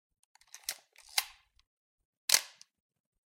camera shutter
Winding up and releasing a Minolta reflex-camera. Vivanco EM216, Marantz PMD671.